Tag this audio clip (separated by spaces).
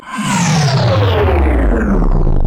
sfx off power-down edited down sound-design rumble digital machine electronic future abstract electric altered-sound atmospheric synthetic game noise